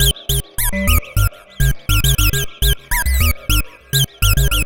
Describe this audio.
FunkySynth Squeaking-hiphop 103bpm
This is a squeaking sequenced synth layered to an organ-like sound.
2 bar, 103 bpm
The sound is part of pack containing the most funky patches stored during a sessions with the new virtual synthesizer FM8 from Native Instruments.